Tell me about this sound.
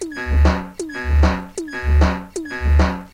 31oBpM FLoWErS The Girl Had My Sex - 3
Edit of Loop #1. Loopable @310bpm! Made with WMCP, from the one and only Bludgeonsoft.
eclectic, experimental, loop, randomized, strange, weird